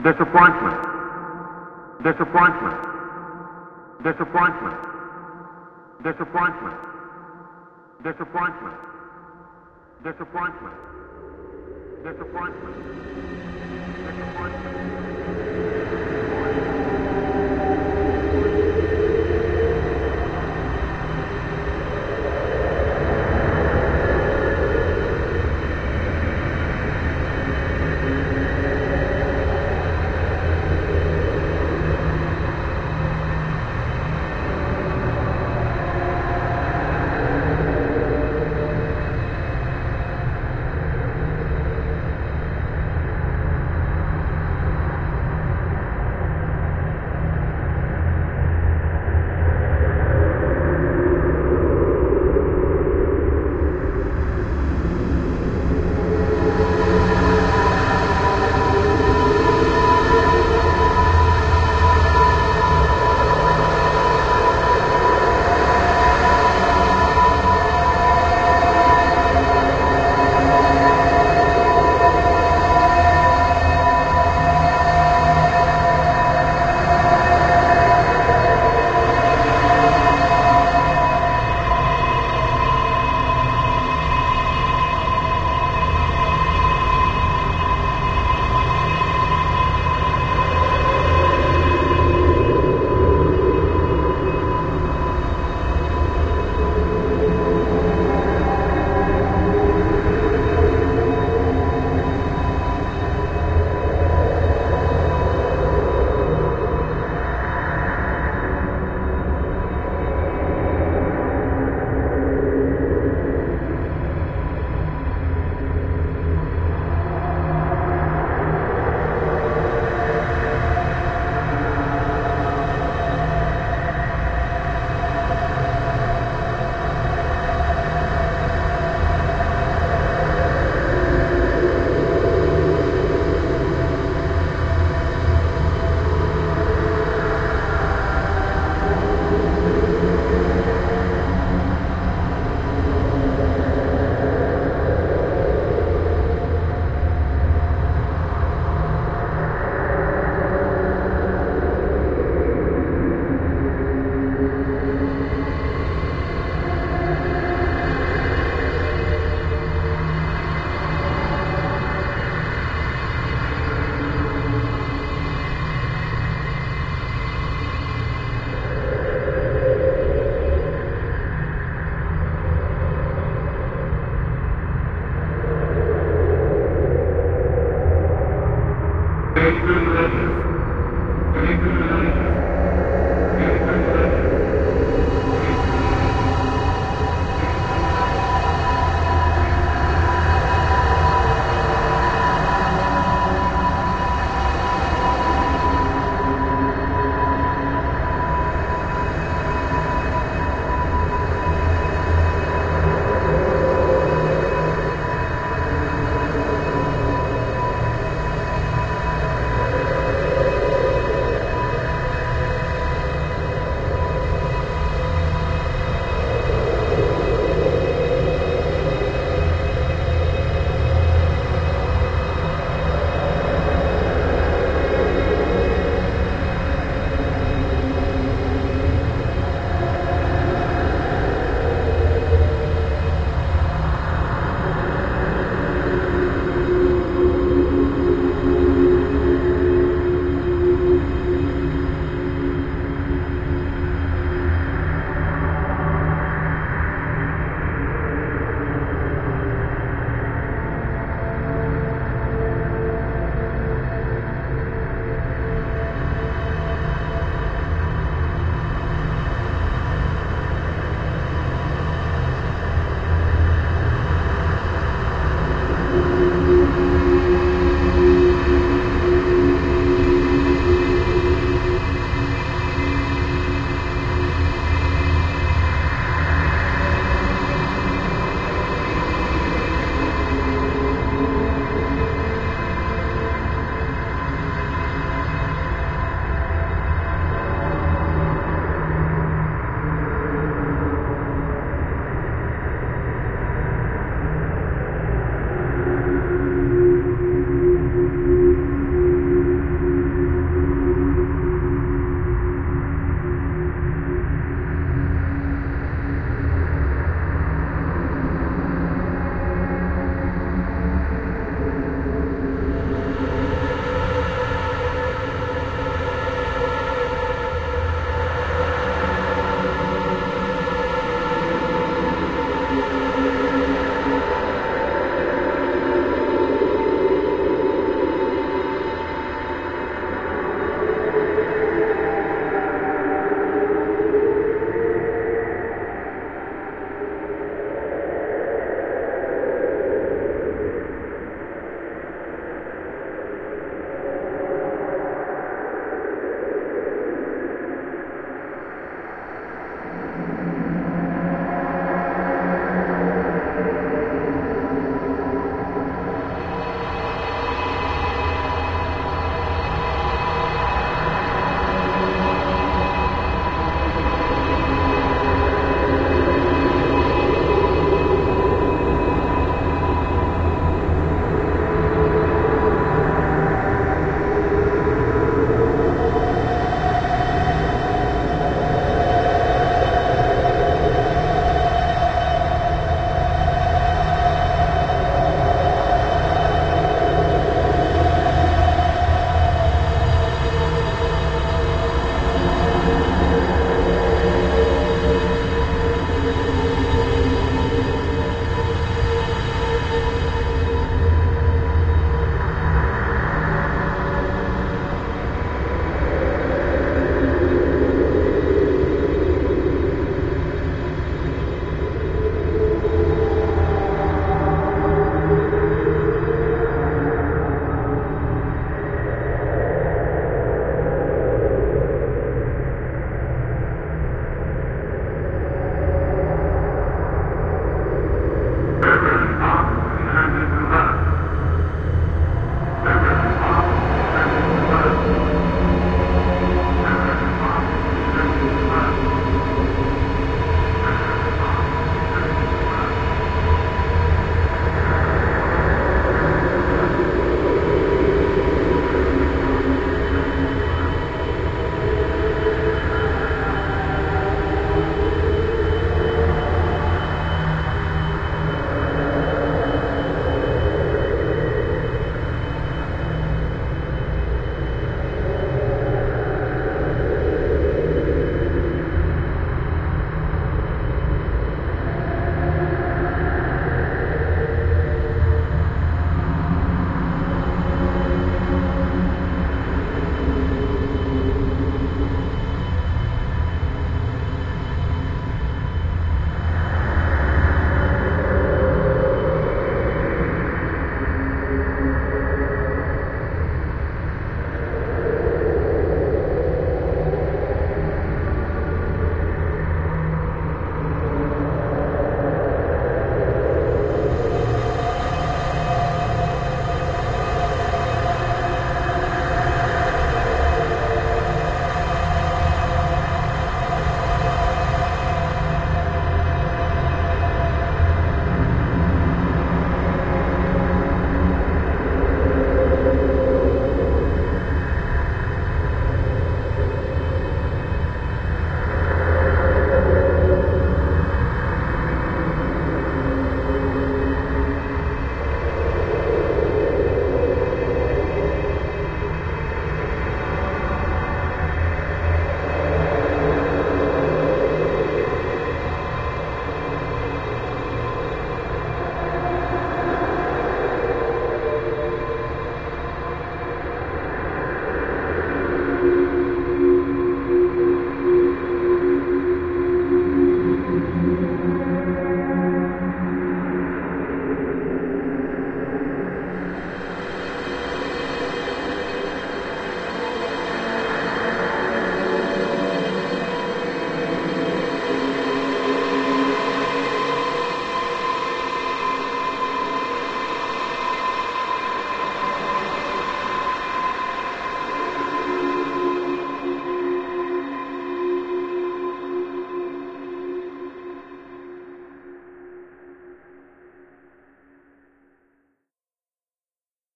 Day 6.
A mix of various sounds by JimiMod, utterly mangled and mashed together to create a trip down psychedelia's lonliest highway. Metal style. Random phrases shouted halfway through (at the beginning, at 3:00 and around 7:09).
Man this is long. Pure- 9 minutes and 57 seconds. All of it is worth a listen.
Uses these samples:
The bottles and cans idea was from a message JimiMod sent me- thanks, I didn't think I'd need it back then but now I realised I do.
Edited in Audacity.
This is a part of the 50 users, 50 days series I am running until 19th August- read all about it here.